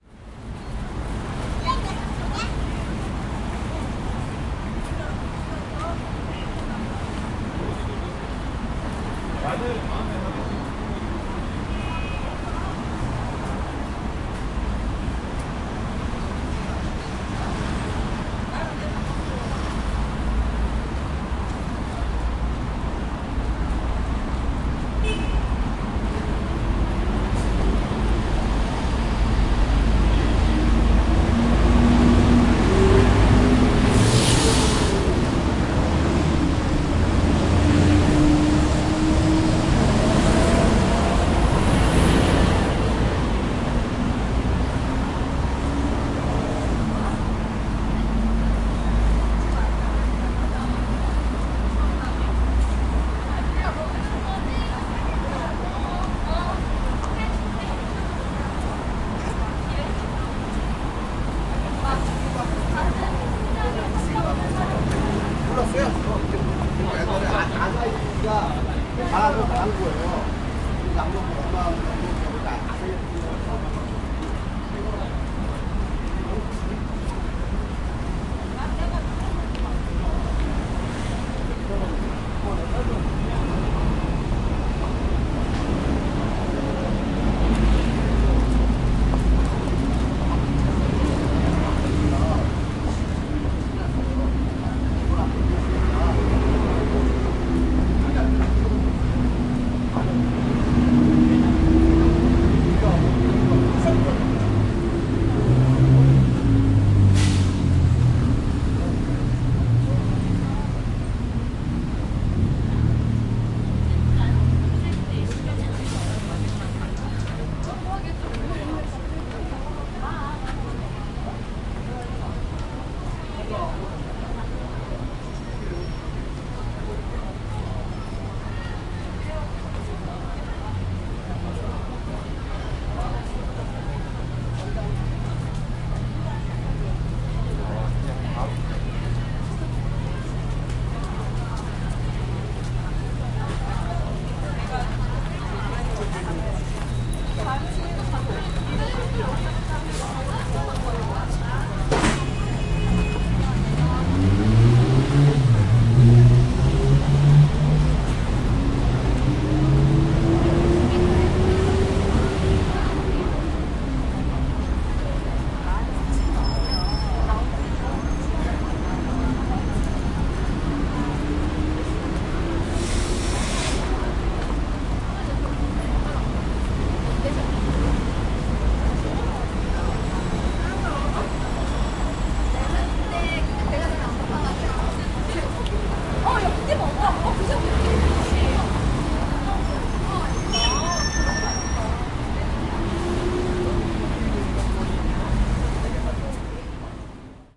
0326 People street
People walking and talkind Korean. Traffic.
20120620
field-recording, korea, korean, seoul, street, voice